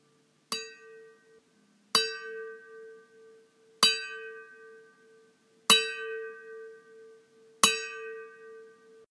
Metallic Ting
Noises made from hitting a spoon against a metal bowl. A slightly haunting bell noise.
strike, metal, clang, ring, ding, clank, bell, metallic, ting, ping